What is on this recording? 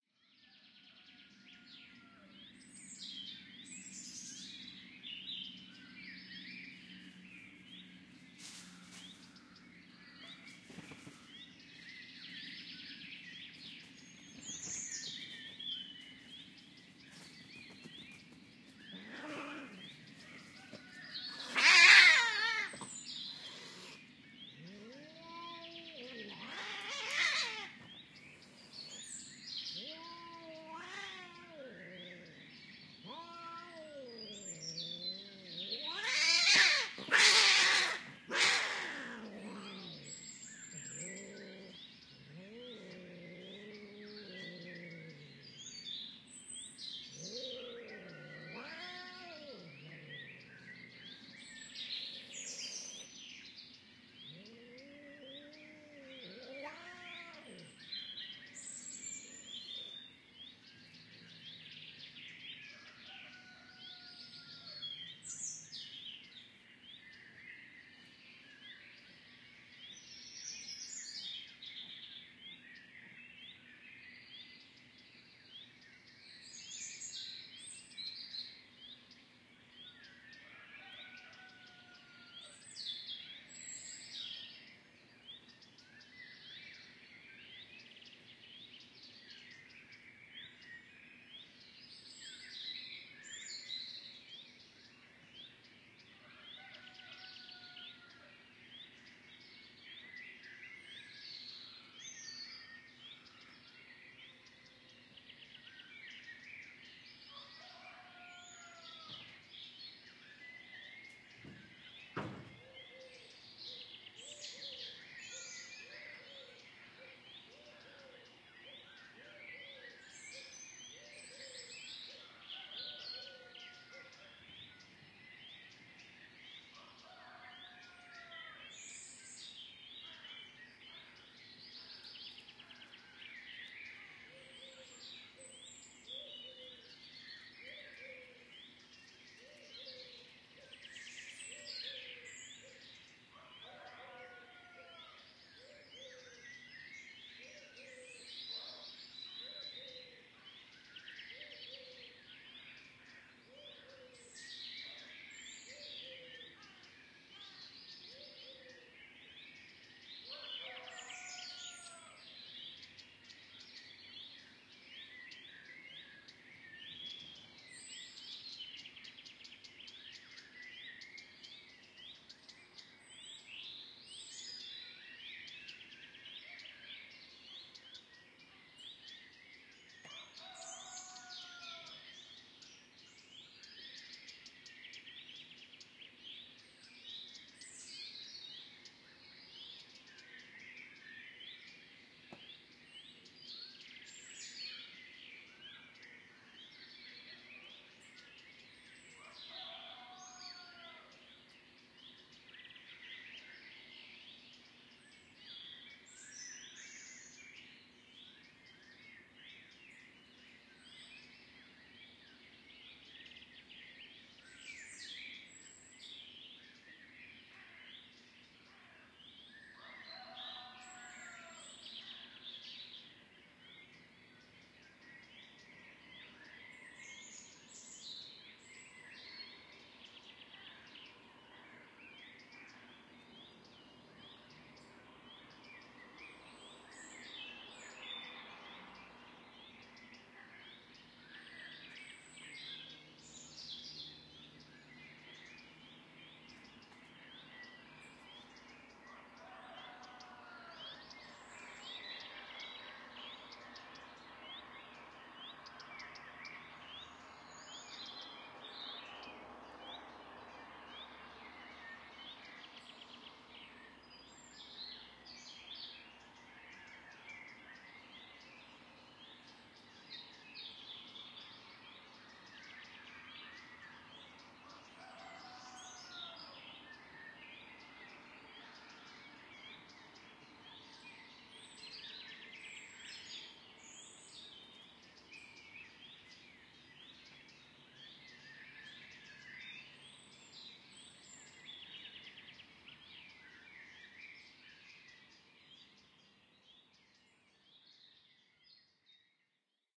CATS & BIRDS - Dawn Chorus
A short cat conflict (he wants - she not) ;-) ...while Birds are singing in the trees and a rooster is crowing then and when. Recorded in the early morning hours at round about 4:00 am.
The spatial impression is best if headphones are used.
The Download-file is a PolyWAV.
If you need to split the file (e.g. to make a stereo file), you can use the easy to use
"Wave Agent Beta"(free)
from Sound Devices for example.
360-degree, 4-Ch, 4-Channel, ATMO, Birds, Birdsong, Cat-conflict, cat-fight, cats, Dawn, Field-recording, High-Quality, HQ, IRT-cross, Morning, Nature, NT1-A, Rode, Surround, Urban